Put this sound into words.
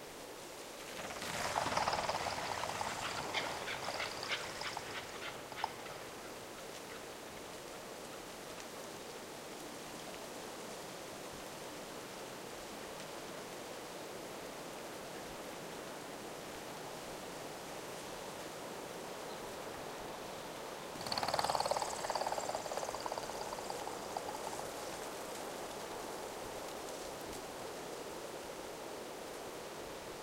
A scared group of Red Partridges escapes from me. Wind on reed in background
winter, south-spain, birds, field-recording, wings, nature, fluttering, partridge